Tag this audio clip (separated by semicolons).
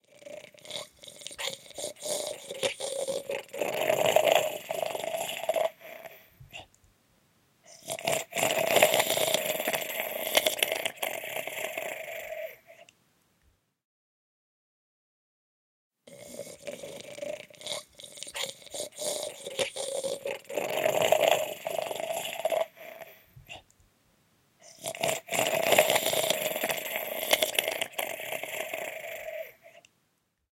horror; voice; Zombie